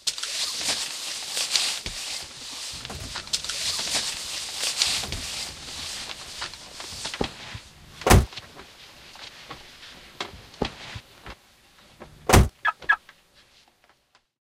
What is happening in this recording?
Own recording of actually getting out of the car made with an Olympus DS50 recorder, combined with the sound of opening and closing the door from Akai samples.
Car-Door, Door, Getting-Out, Car